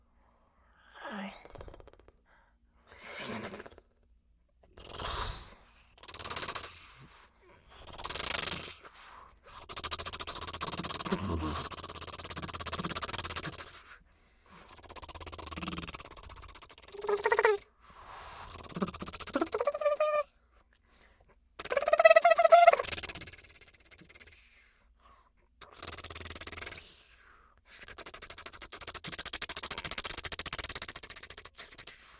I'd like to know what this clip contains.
velociraptor tongue flicker